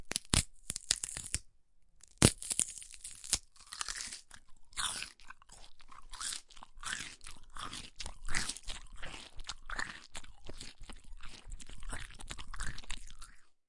Bone breaks and chew
Celery, sinew, horror, disgusting, bone, break, flesh, gory, chew